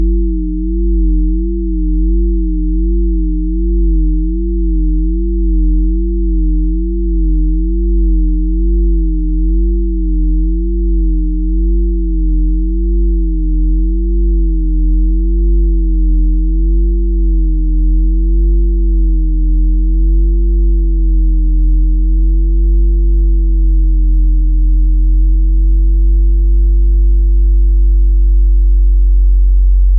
Long stereo sine wave intended as a bell pad created with Cool Edit. File name indicates pitch/octave.
bell, pad, synth, multisample